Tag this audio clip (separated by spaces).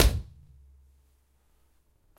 percussion zoom drum condom bassdrum rubber bass kick h2